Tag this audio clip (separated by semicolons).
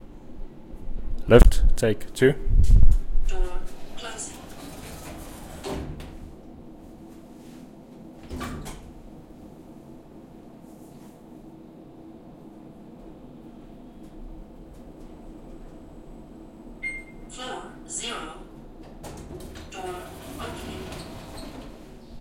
closing; door; Elevator; Metal; open; opening; OWI